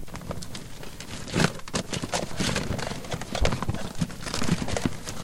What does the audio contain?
Held mic up to a box of cords and cables while searching through. Recorded with $30 mic from Target. A M10 Samson. Recorded with Audacity.